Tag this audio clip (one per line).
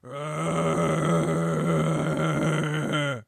brains
dead